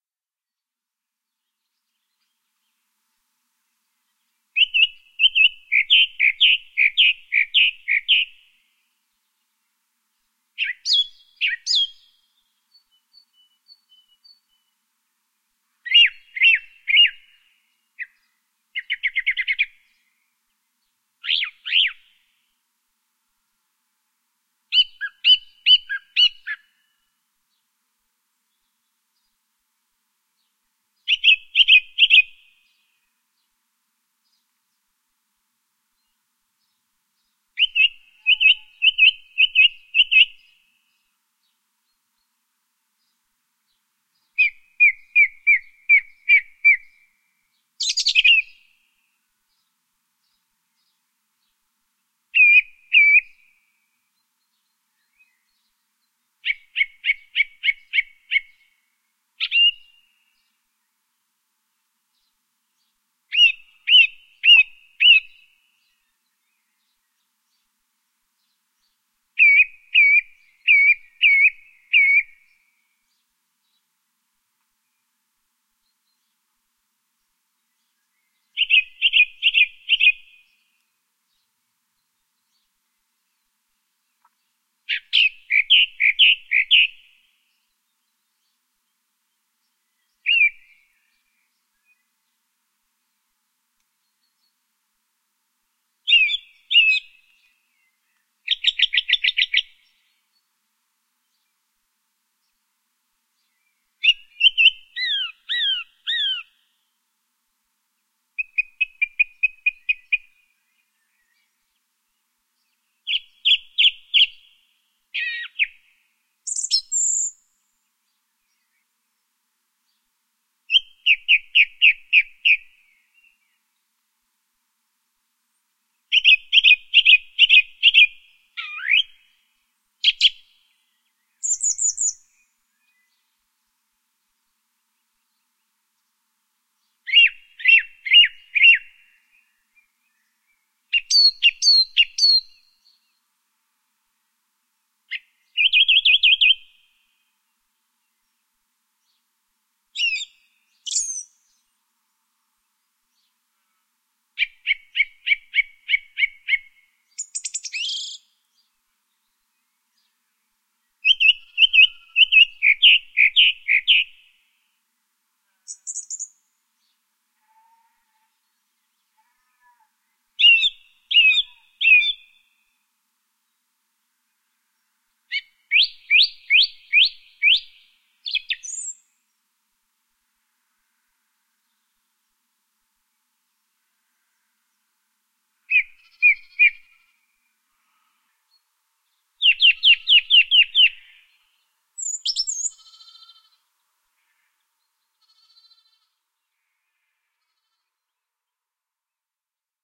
A dual mono field-recording of a Song Thrush (Turdus philomelos) singing in spring.Edited for the annoying sound of neighbours central heating boiler. Rode NTG-2 > FEL battery pre-amp > Zoom H2 line in.
singing, song-thrush, bird, turdus-philomelos, song, field-recording, mono